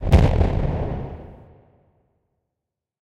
Distorted Impact Short
Distorted, short Impact.
cinematic, dark, distorted, huge, impact, industrial